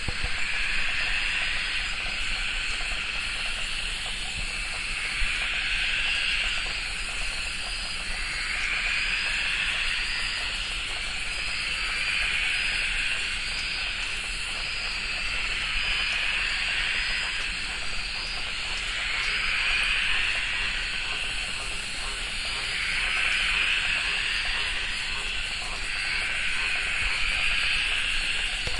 Australian sedge frogs calling in a pond. Recorded on a Zoom H4N1
croak, croaking, frogs, pond